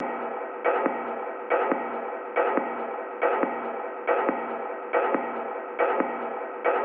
Space Tunnel 1
beat, electronica, loop